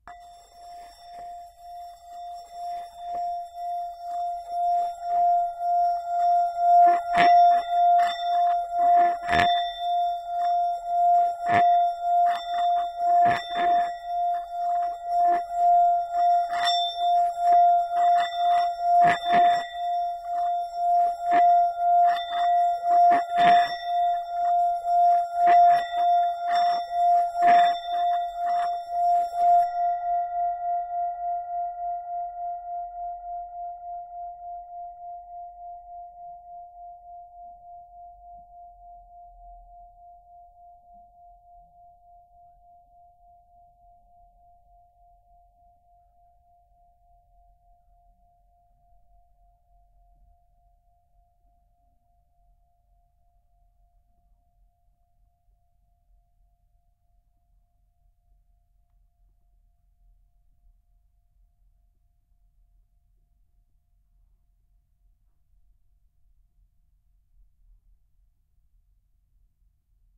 Tibetan Singing Bowl 10cm (Rub)
10 cm Tibetan singing bowl being resonated. Recorded using Sennheiser 8020s.